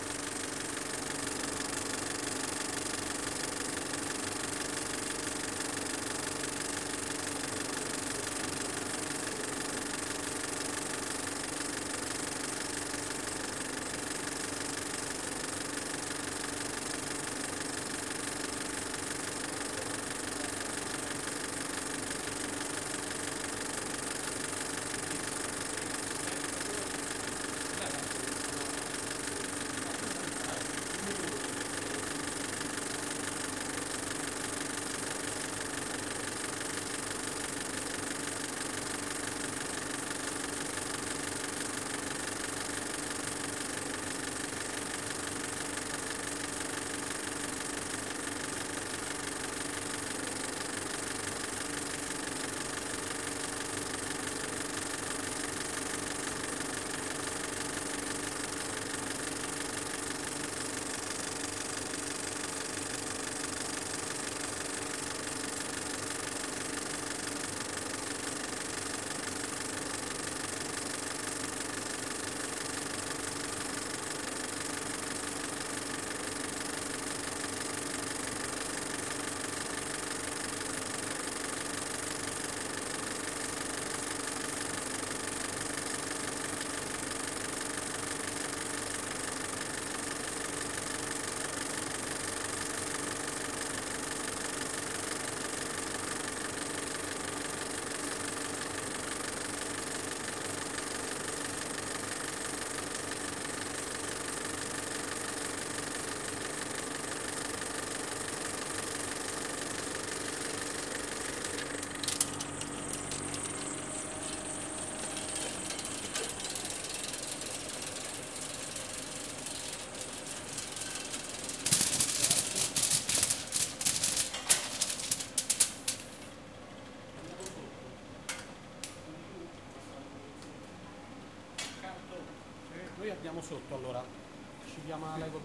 film projector 03
cinecitt, projector